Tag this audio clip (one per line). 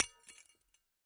glass
hammer
ornament